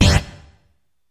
progressive psytrance goa psytrance
goa, progressive, psytrance